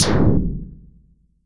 One of several versions of an electronic snare created using a portion of this sound
which was processed in Reason. Further processing (EQ and trimming) in Audacity.